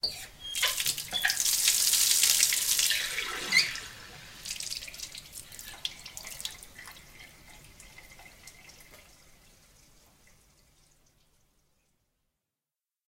Filling glass with water from an old-fashioned squeaky tap (faucet) above stone sink
I needed an off-stage sound effect of a character filling a glass of water. The play was set in an old cottage situated in isolated countryside in the north of England. Whilst helping build scenery for another show, I suddenly realized that the old stone sink and taps in our paint-shop would fulfill what I wanted. So armed with a glass and my Edirol ....
draining-water, faucet, Filling-glass-from-tap, old-fashioned-faucet, old-fashioned-tap, squeaky-faucet, squeaky-tap, stone-sink, tap